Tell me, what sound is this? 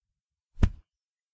punch for your film/video
hit, awesome, punch